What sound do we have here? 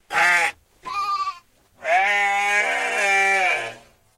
Lamb Chop Slow Down over 75percent
I used a time shift technique on Audacity to gradually slow down a goat "baw". It begins very quick and then as it slows down it becomes more granulated. There are two goats.
aip09, bah, berber, chop, down, farm, goat, gradual, granulation, lamb, remix, shift, slow, speed